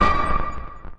STAB 095 mastered 16 bit
Electronic percussion created with Metaphysical Function from Native Instruments within Cubase SX.
Mastering done within Wavelab using Elemental Audio and TC plugins. A
higher frequency noise stab for synthetic drum programming. With some
lower frequency cracks.
electronic, percussion, stab